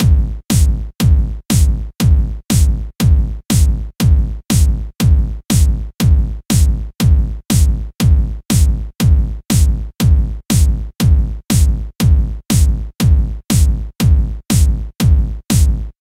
PapDrum 1 4/4 120bpm
This is part 1 of the same drum line from a recent song I made.
4, 120-bpm, hard, techno, quantized